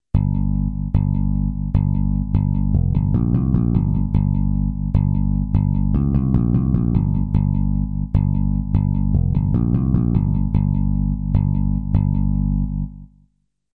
fingerbass loop heavy 150bpm
low slung loop with added compression, starts digging deep.
bassloop, bass, low, bass-loop, heavy